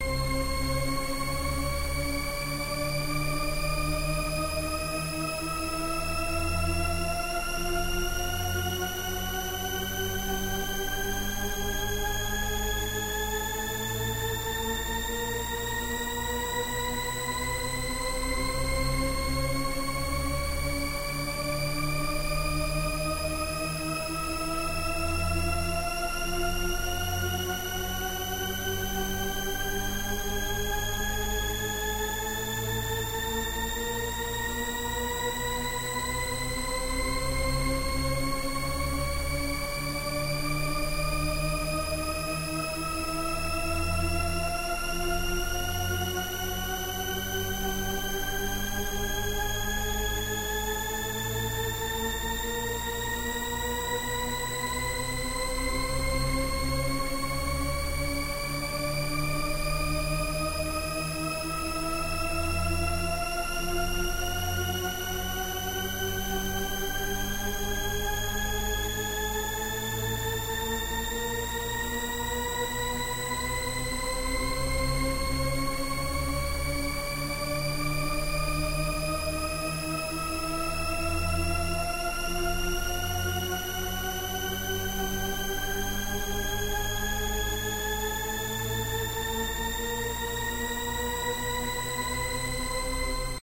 Pitch Paradox up
This is an example of the 'pitch paradox' listen to the rising pitch, and it seems to keep rising forever, which is impossible, hence the paradox :)
pitch, rising, shepard-scale